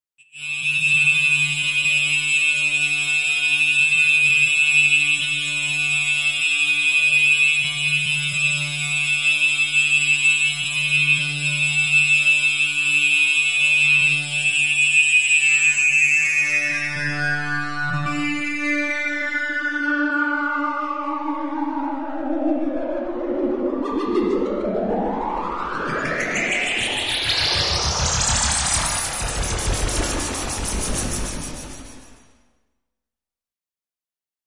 capas sun 5
experimental techno sounds,production
beat, experimental, produccion, sintetizador